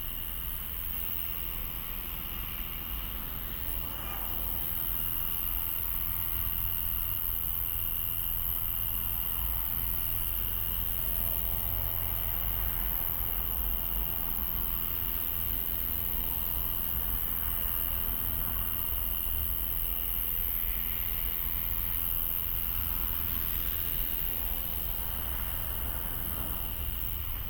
Ambience city night DPA semibinaural
Night ambience sounds near my home recorded on DPA 4060 stereo set attached to headphones. Used gear: MixPre-D and DR100 mk3
ambient, city, atmosphere, night, atmos, background